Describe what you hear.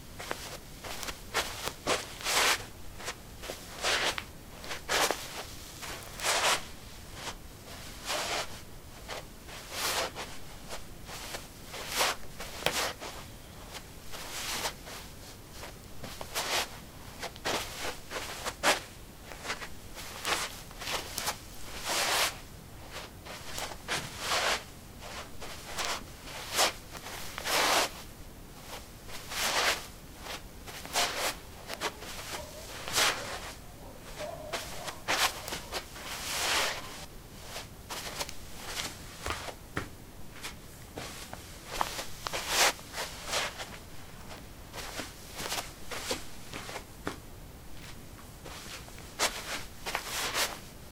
carpet 02b socks shuffle doormat
Shuffling on carpet: socks. Recorded with a ZOOM H2 in a basement of a house, normalized with Audacity.
footsteps, steps, footstep